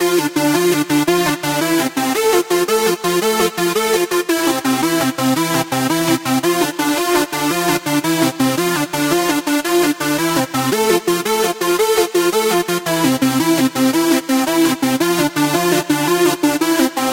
112-dance-at-the-barn
dance riff loop 112bpm